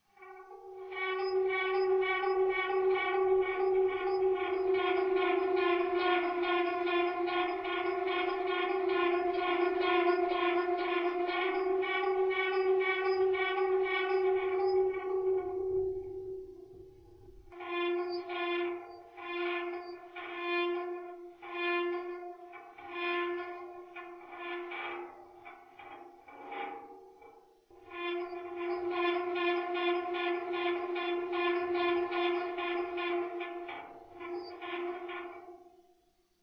Creaking Metal - Slow
Squealing sound that has been slowed down, creating the effect of a giant metal structure under rapid stress.
This sound is a modification from the sound "Creaking Metal Desk".
Recorded with: Shure SM57 Dynamic Microphone.
Squeaking, Creak, Effect, Movement, Wiggle, Sci-Fi, Squealing, Wobble, Stress, Eerie, Shake, Metal